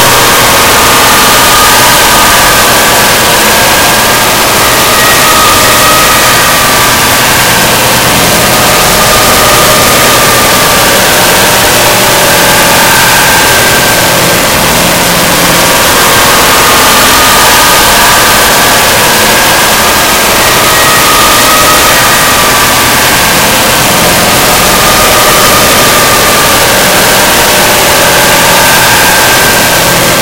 jump Scare
jump, scary